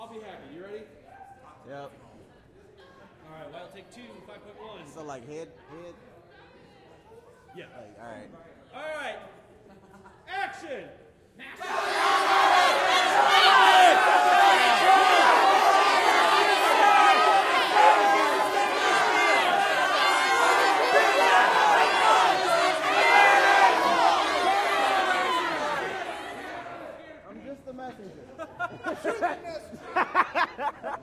Riot Crowd Immersed in 5.1 Take 2
1, 5, Crowd, Holophone, Protest, Riot